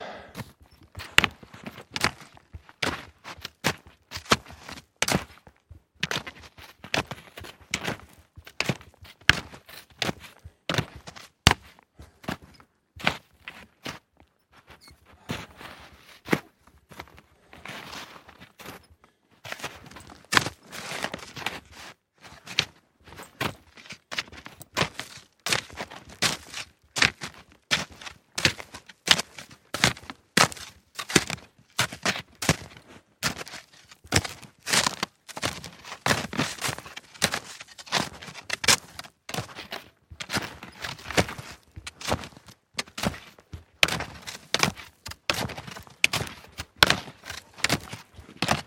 footsteps snowshoes old wood1 very packed path clacky
packed, old, wood, footsteps